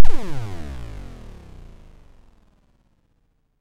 Generated with KLSTRBAS in Audacity.